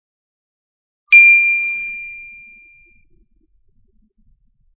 A vintage carillon sample played with a manual lever.
vibrating, baby, old, vintage, vibration, high, lever, sad, carillon, steel, metal, manual